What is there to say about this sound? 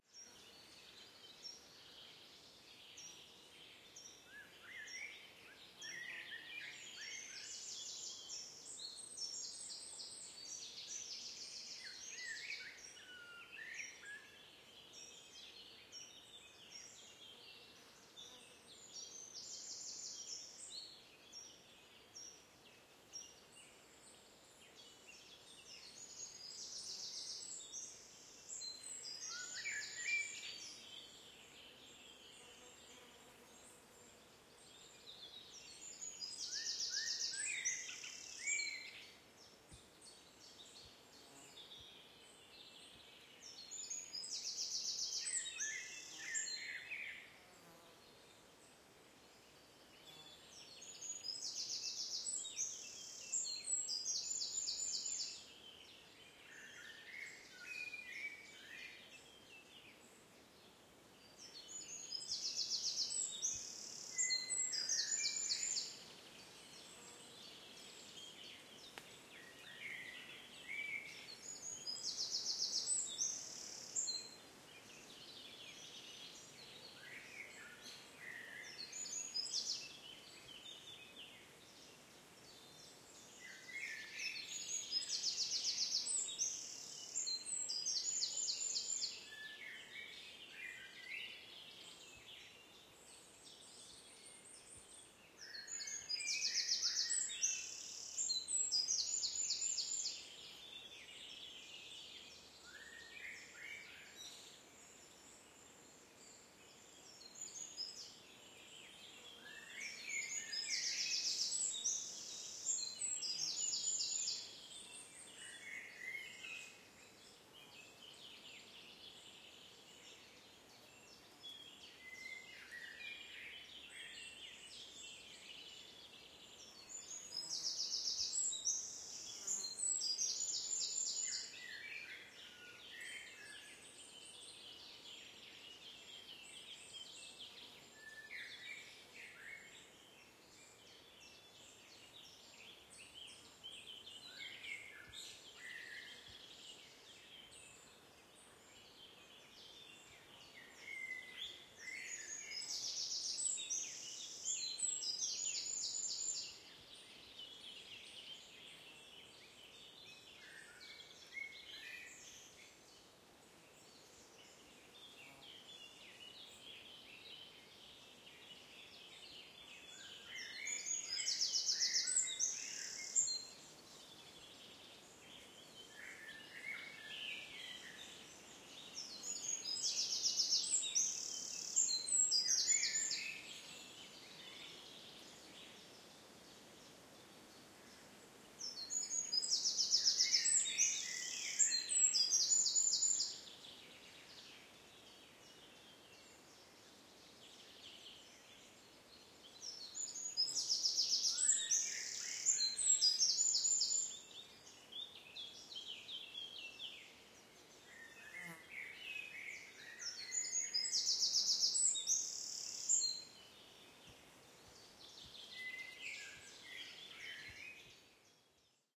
Morning in the forest, european robin and common blackbird
A quiet sunday morning in the "Forêt de Grimbosq" (south of Caen, France). A european robin and a comon blackbird quite close.
AT4021 AB stereo setup - Sounddevices Mixpre 6
Recorded the 16 of june 2019, 8 AM.